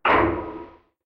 Deep metallic clunk sound
clank, clang, metallic, tink, metal, bang